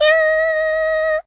Very silly sound effect - basically meant to be a tiny cartoon character singing a single note.